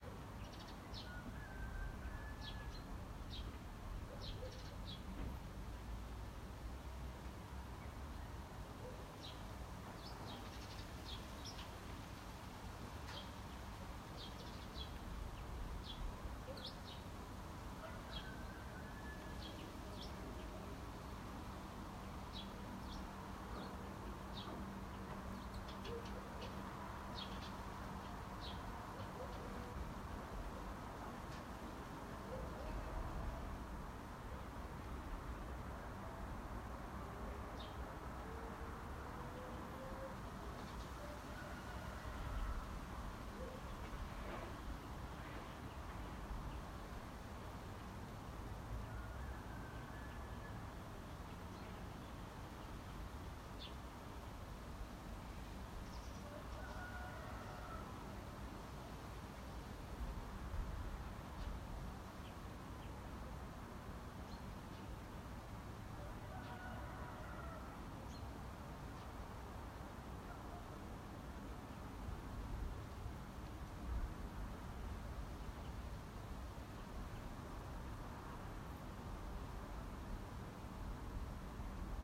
Foley, Village, Birds, Ruster
Bird, Birds, Distant, Nature, Ruster, Soundscape, Village